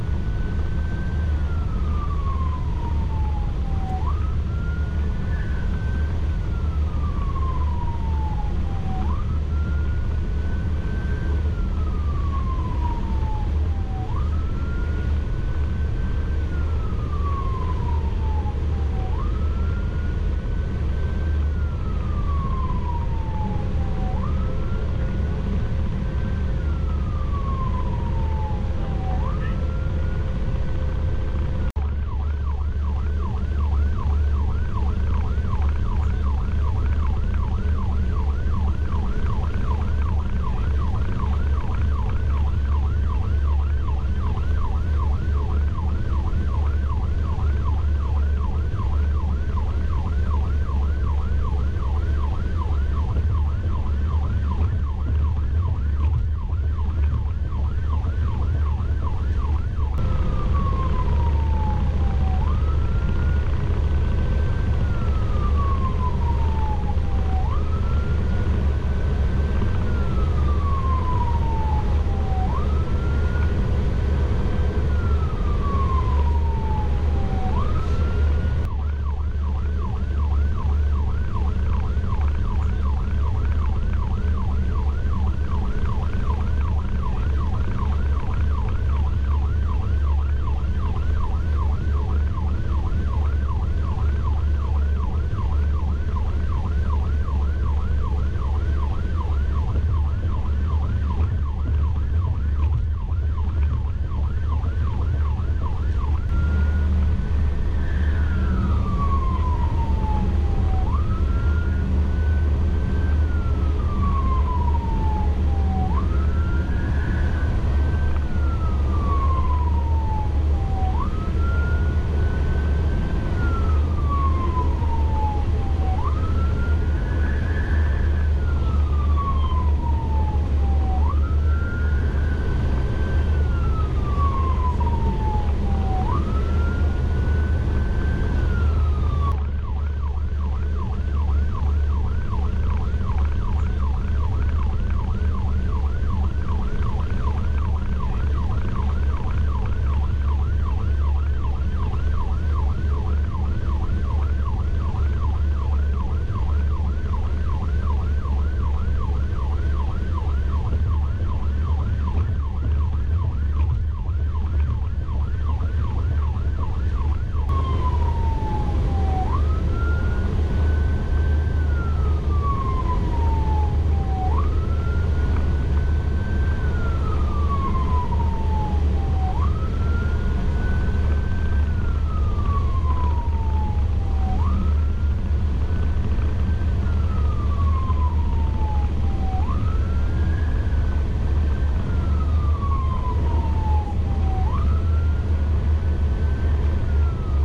Emergency Traffic-1
Sirens from inside a vehicle going emergency.
police, siren, 911, traffic, ambulance, rescue, emergency, sirens, firetruck